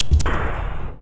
This is part of a sound set i've done in 2002 during a session testing Deconstructor from Tobybear, the basic version
was a simple drum-loop, sliced and processed with pitchshifting, panning, tremolo, delay, reverb, vocoder.. and all those cool onboard fx
Tweaking here and there the original sound was completely mangled..
i saved the work in 2 folders: 'deconstruction-set' contain the longer slices (meant to be used with a sampler), 'deconstruction-kit' collects the smallest slices (to be used in a drum machine)